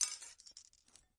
Piece of broken glass thrown into a pile of broken glass. Close miked with Rode NT-5s in X-Y configuration. Trimmed, DC removed, and normalized to -6 dB.
dropped, broken, glass